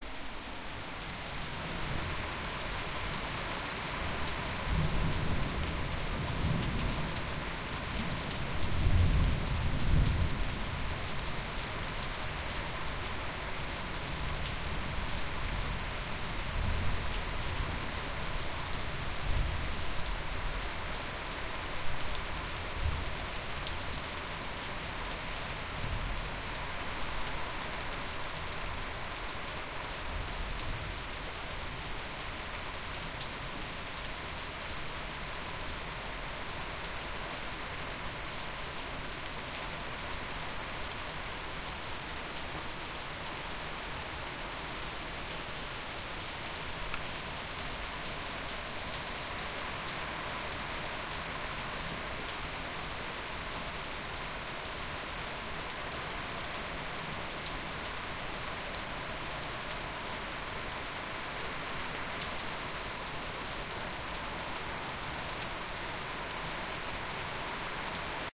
Raining in Rome
Environment, Rain, Storm